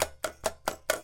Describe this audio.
v cell LlLll .R
recordings of variouts trumpet extended techniques, performed by David Bithell, recorded by Ali Momeni with a Neumann mics (marked .L) and an earthwords (marked .R). Dynamics are indicated with from pp (very soft) to ff (very loud). V indecas valve, s and l indicate short and long, pitches in names indicate fingered pitches,
technique, davood, valve, clicks, extended, rhythmic, trumpet